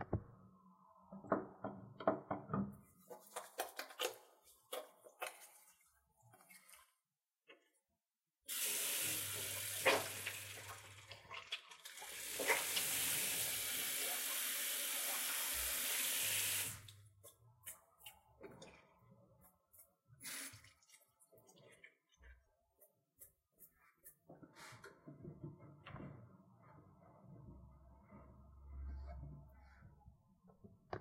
washing hands (midplane)
Sound of someone washing their hands in a small bathroom. This sound has been recorded with a zoom recorder and it has been retouched eliminating background noise. The sound was recorded at a distance of one meter.